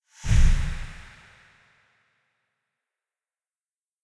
Horror Stinger 12 Vampire Nest
Horror Stinger Jump Scare Sound FX - created by layering various field recordings and foley sounds and processing them.
Sound Design for Horror